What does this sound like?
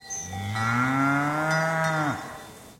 Single moo from a cow, with nice echo. EM172 Matched Stereo Pair (Clippy XLR, by FEL Communications Ltd) into Sound Devices Mixpre-3 with autolimiters off. Recorded near Aceña de la Borrega, Extremadura (Spain)
cattle, country, cow, farm, field-recording, moo, rural